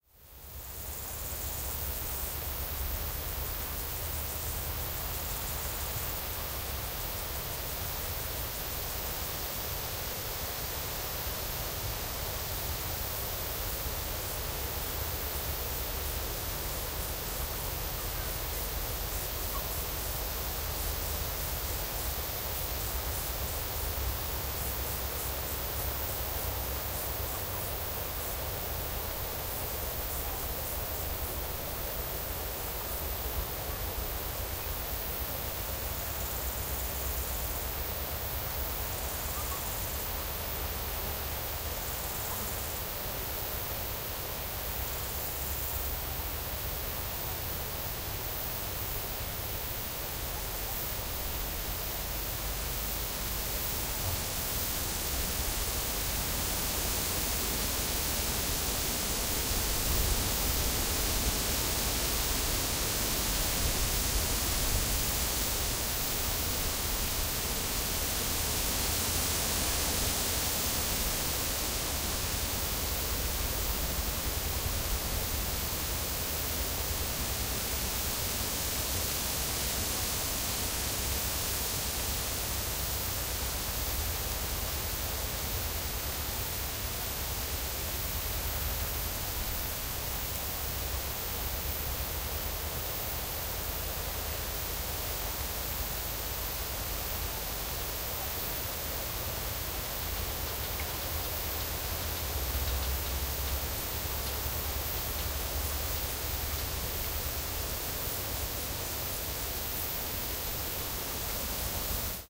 -56 MS WILCZA WOLA ATMO insects on a summer meadow
Quiet summer meadow atmosphere dominated by cricket sounds.
general-noise, field-recording, atmo